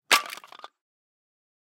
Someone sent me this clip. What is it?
SFX wood fall pile bunch stone floor 02
SFX, wood, fall, pile, bunch, stone floor, drop, falling, hit, impact
bunch, drop, fall, falling, floor, hit, impact, pile, SFX, stone, wood